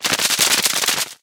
Mechanical, transformation, fast, Clicks, mechanism, press, gear # 2
Channels: Mono
transformation, Mechanical, Machine